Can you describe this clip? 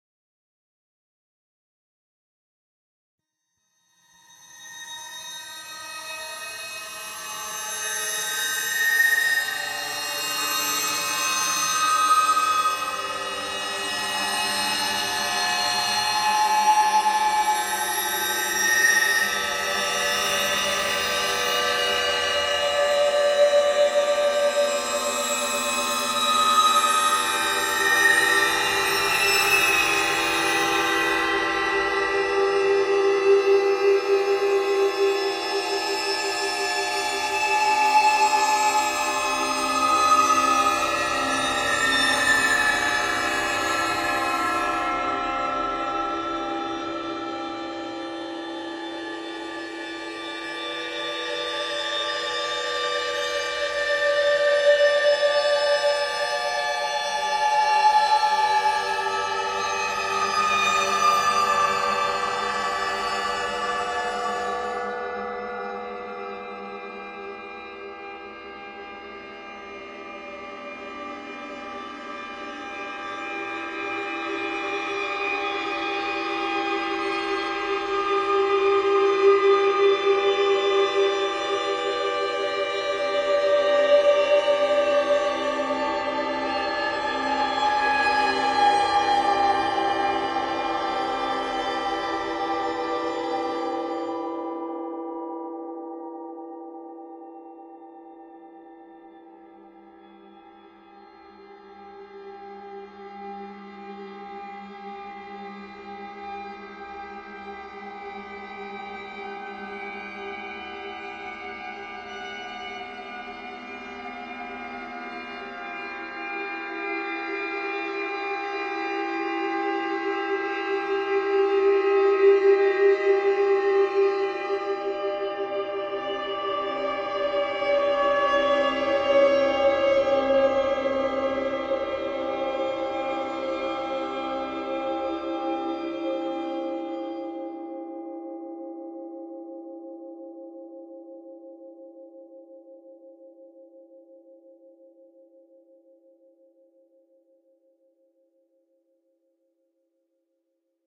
sitar1 stacked
Just some examples of processed breaths form pack "whispers, breath, wind". Comb-filter patch in which a granular timestretched version of a breath is the 'noisy' exciter of the system (max/msp) resulting in a somewhat sitar-like sound.
sitar, high, tension, drone, suspense, eery, comb, filter